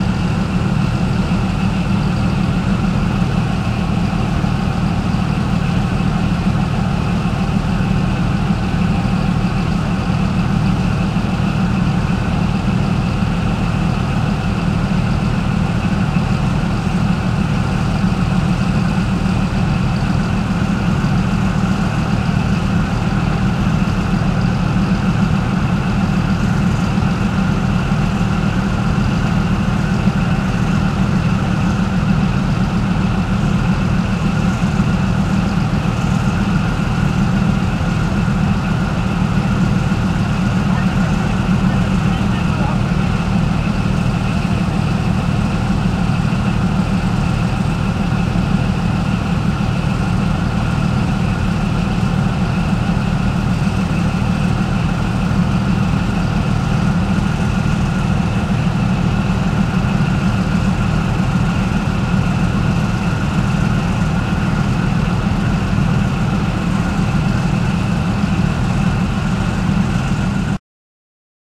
WAR-TANK-ENGINE STAND BY-Heavy diesel engine-0003
Heavy trucks, tanks and other warfare recorded in Tampere, Finland in 2011.
Thanks to Into Hiltunen for recording devices.
engine, field-recording, parade, tank, warfare